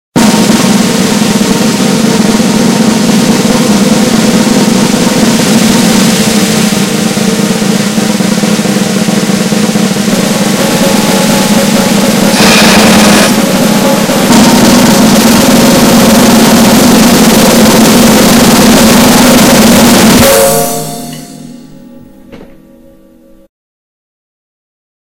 20 Second Drum Roll
I created this 20-second Drum Roll with Cymbal Accent.
I have the sound sources.
Sound ID is: 564205
Drum-Roll, cymbal, crash, 20, seconds, Sonic, drumroll